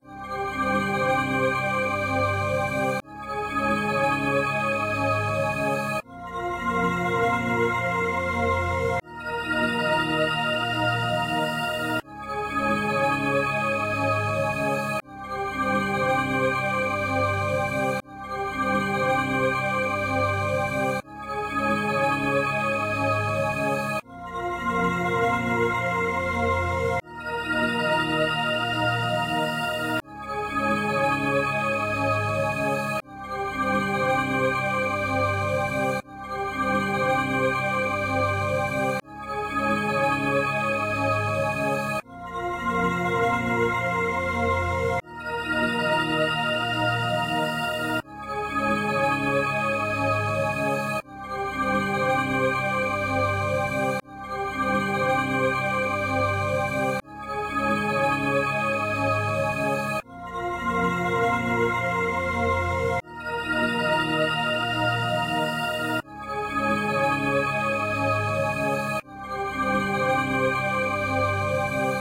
Dreamy. Wave
A short dreamy sound perfect for a videogame.
calm, creepy, magic, meditation, music, noise, piano, spa, study, wave, Zen